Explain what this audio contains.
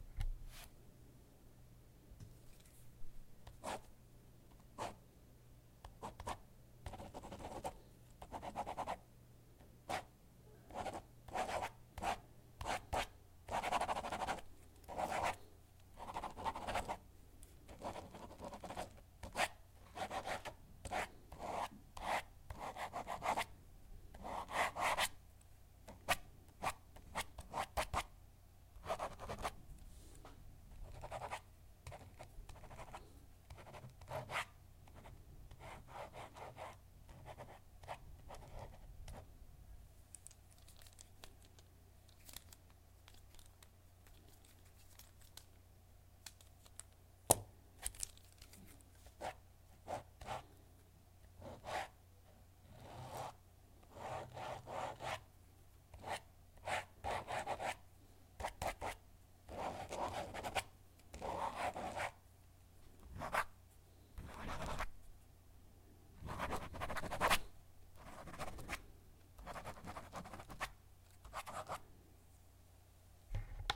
Pen on paper, clicking pen and tumbling it around